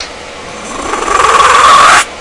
sega sound
No, not the "Segaaaaaa" sound you heard in video games. It's a cool little sound effect you get when you inhale in a special way.